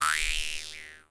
jewharp recorded using MC-907 microphone